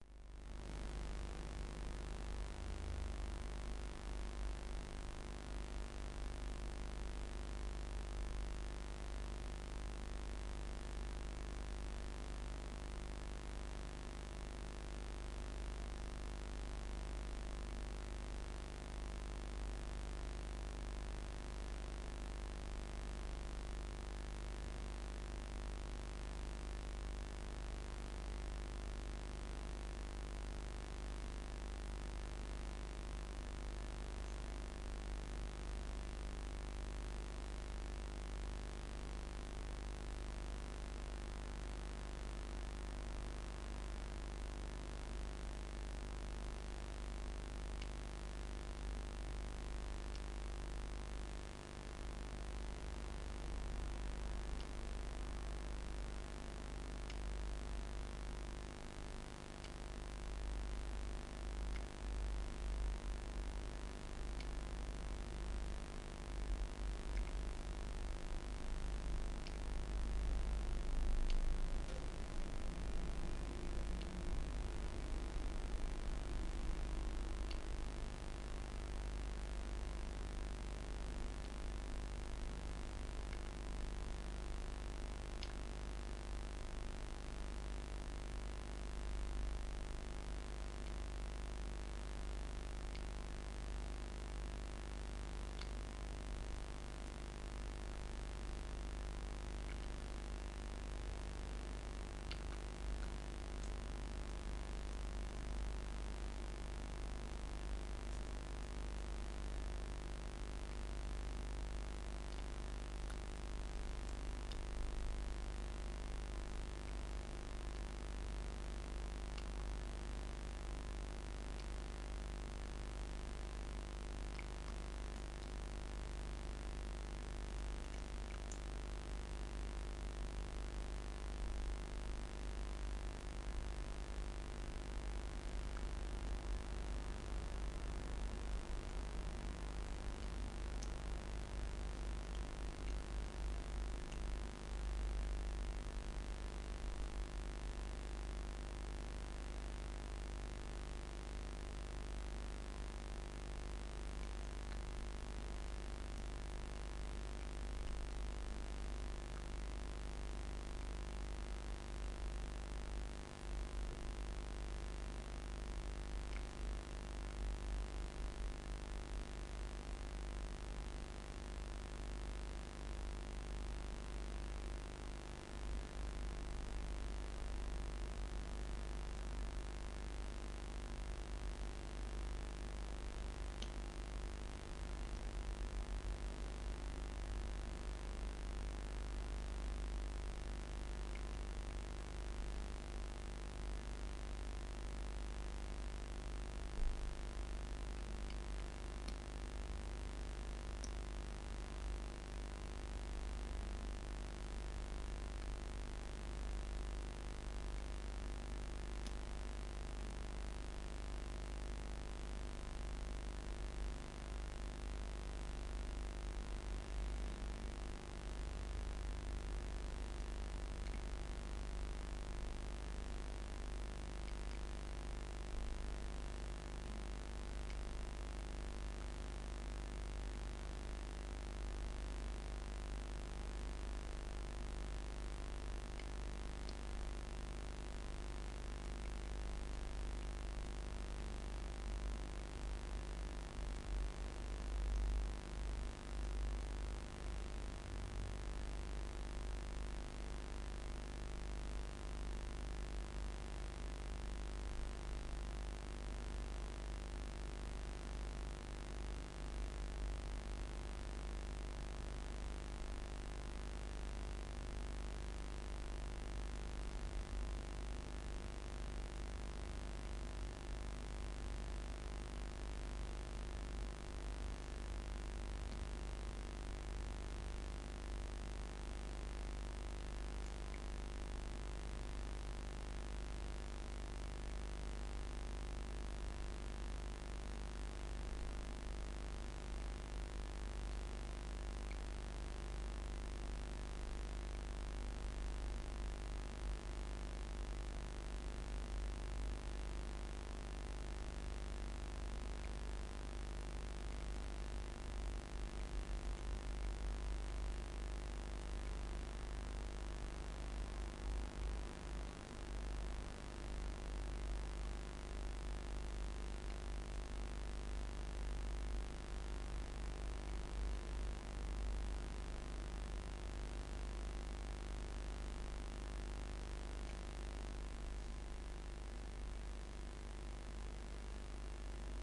ECU-(A-XX)217 phase1
16V, Atmospheric, ATV, Battery, Beam, Broadband, Carb, Channel, COx, Dual, ECM, ECU, Fraser, Iso, Jitter, Lens, Link, MCV, NOx, Optical, Path, PCM, Reluctor, SOx, Synchronous, T1xorT2, T2, Trail, UTV, Wideband